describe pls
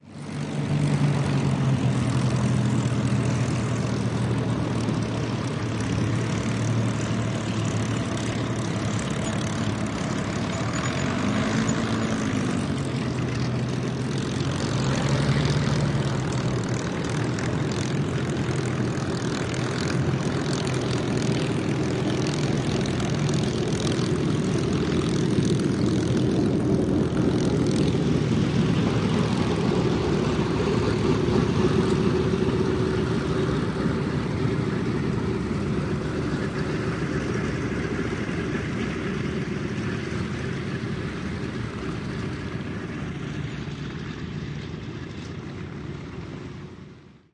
Spitfire and B-17 Taxi by
This is a recording of a Supermarine Spitfire Mk. Vc with a Rolls-Royce Merlin, a Spitfire Mk. IXe with a Packard Merlin, and a Boeing B-17G Flying Fortress with 4 Wright cyclone engines taxiing by.
Aircraft, Airplane, B-17, Boeing, Bomber, Rolls-Royce, Takeoff, Taxiing, Wright, WWII